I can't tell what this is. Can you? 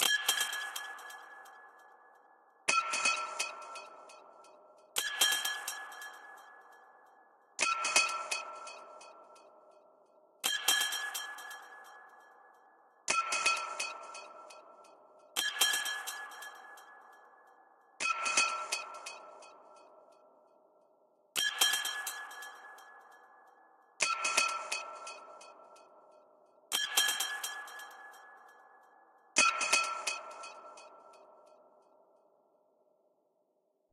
Irregular Glass Clock
An interesting glassy clock-like noise with an irregular rhythm that gives off some seriously unnerving vibes
Made from hitting a lemonade bottle with a pair of fingernail clippers.